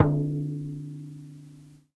Tape Hand Drum 5
Lo-fi tape samples at your disposal.